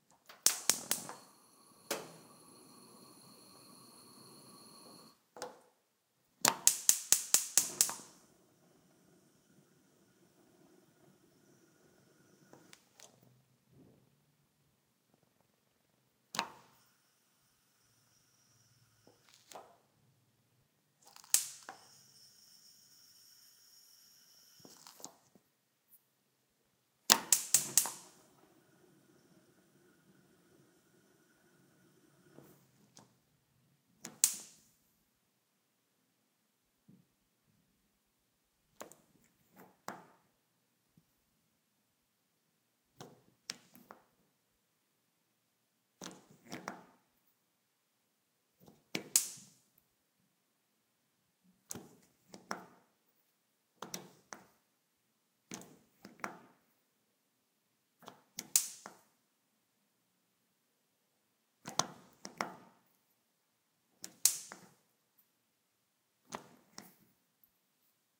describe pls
Stove knob ignition light
igniting the stove using a knob
cooking, ignition, kitchen, knob, light, oven, stove